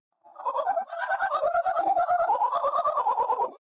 The scream male_Thijs_loud_scream was processed in a home-made convolution-mixer (Max/MSP) where it was mixed with the convolution of it's own sound, but at different times.